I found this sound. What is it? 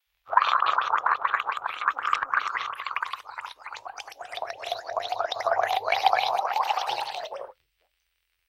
delphiz H2O MOUTH SHAKE 2
Delphi is shaking water in his mouth!
fx, h2o, mouth, noise, shake, shaker, water